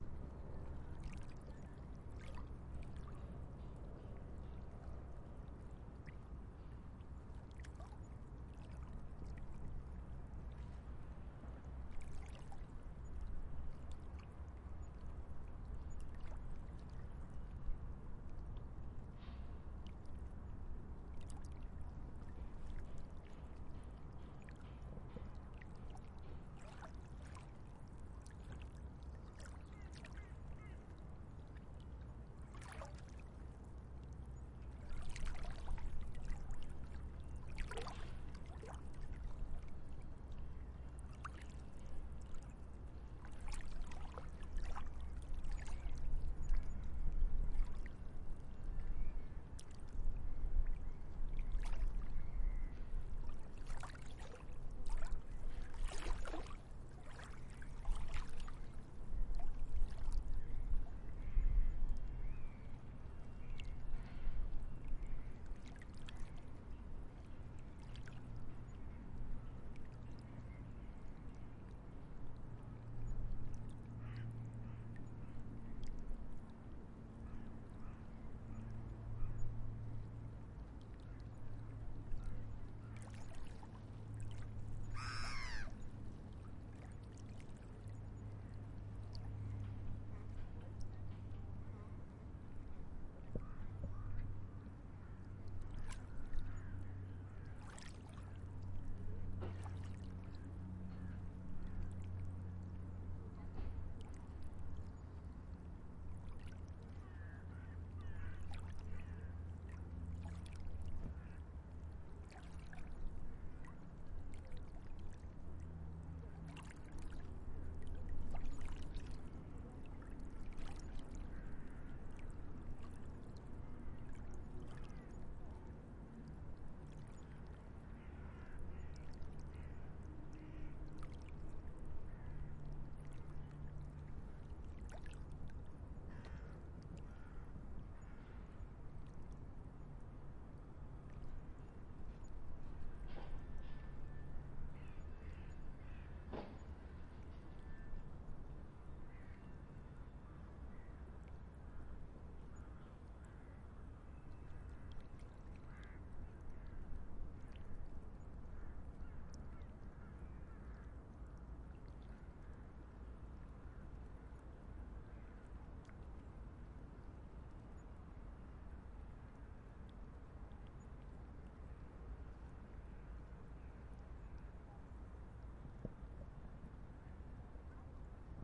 atmo water traffic
Atmo of small waves with far away traffic sound. Recorded at the Alster in Hamburg, Germany. Recorded on a Zoom H5 with x/y-head.